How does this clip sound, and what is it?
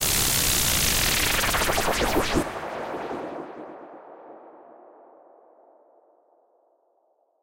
Transition FX created with brown noise, dynamic EQ and stretching. Everithing in cool edit 2.1.
brown-noise, effect, fx, sound-effect, swoosh, transition